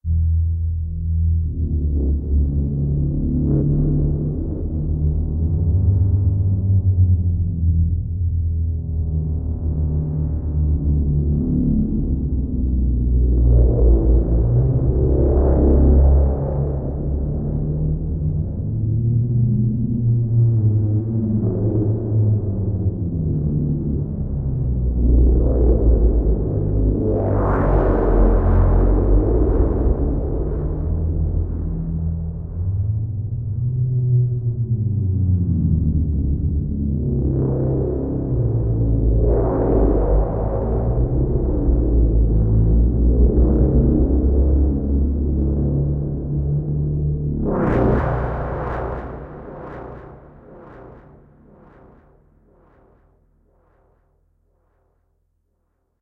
the evil arrives. horror ambience.
synthetic sound. synths used: novation v-station, arturia minimoog-V.
ambience, creepy, fear, horrifying, horror, monster, scary, science, thrill, thriller